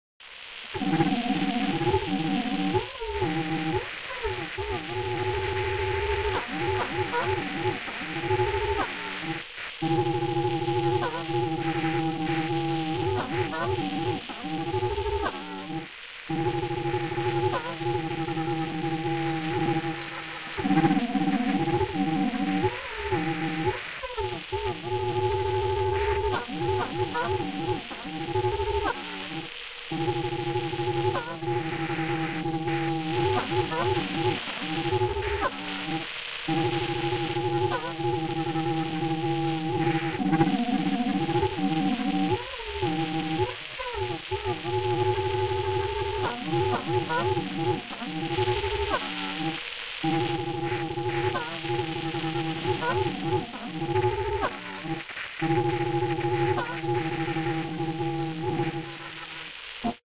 Radio Interference
Strange emissions at night
Interference, Noise, Radio, Voice